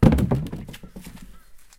a selection of dropped items sounds recorded on a Zoom H4n recorder and edited in Adobe Audition
Please use and enjoy these sounds.
Don't be a douchebag and repackage or sell them as your own, karma will bite you in the dogma!
bangs, clangs, crack, drop, dropped, dropped-items, falling, field-recordings, hammer, hammer-hit, impact, metal-clangs, metal-drop, thud, thump, whack, wood-drop